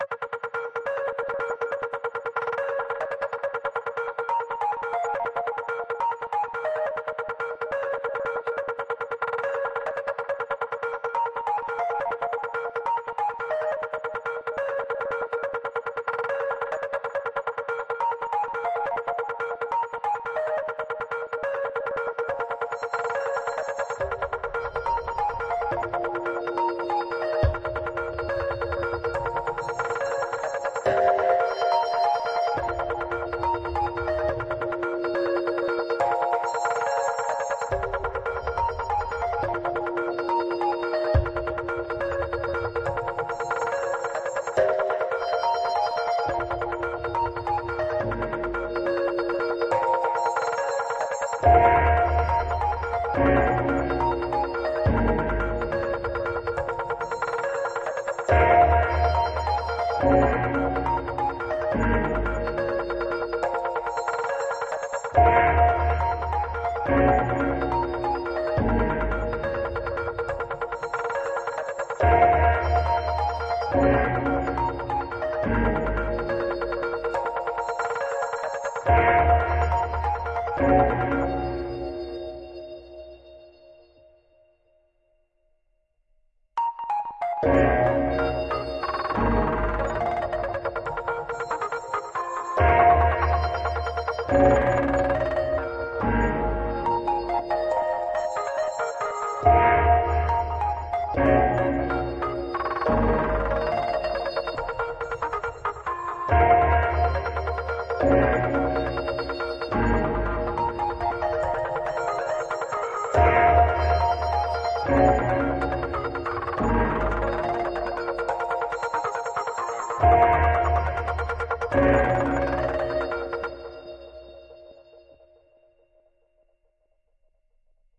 Tilted Synth 1 (140bpm)
Kind of really like this style of high pitched synth that I created here.
Mostly with Rhodes piano heavily processed and chopped very short.
140 bpm. I may upload the drum version next, idk ;0
Eardeer's awesome remix.